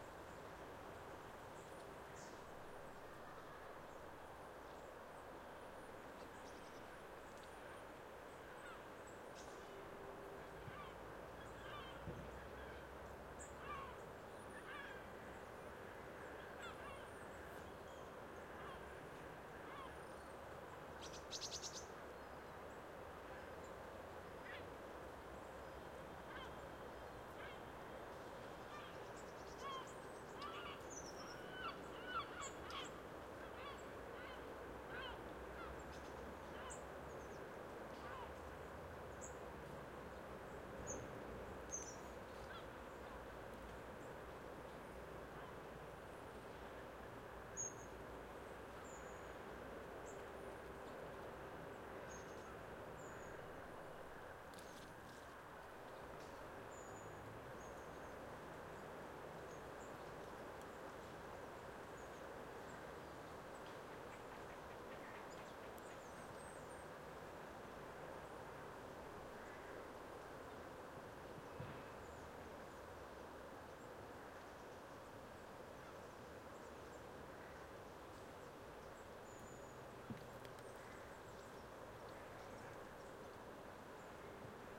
Park Exterior Ambience
Two separate recordings mixed into stereo. Field-recording of Queens Park in Glasgow.
Ambience, Birds, Field-Recording, Glasgow, Insects, Stereo